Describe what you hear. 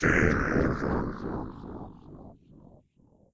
Game Over 03, Lost Consciousness
voice, game, survival, died